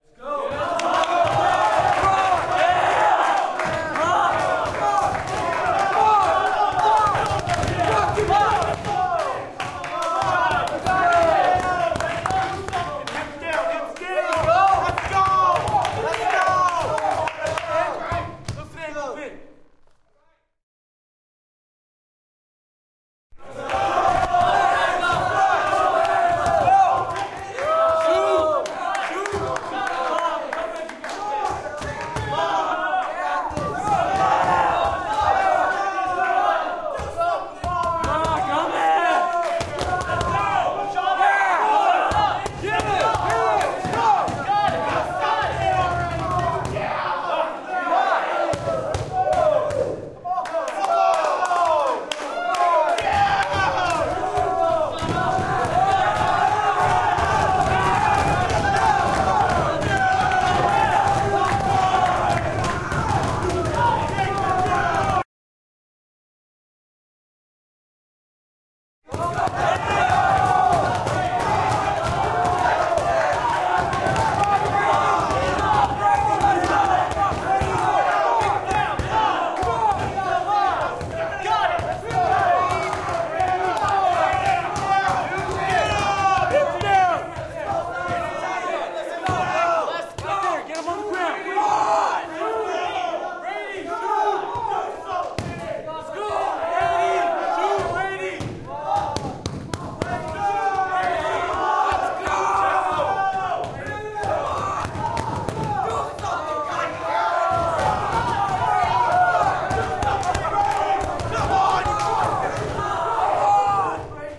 applause cheer int medium high school guys wrestling active good encourage friends gym1 echo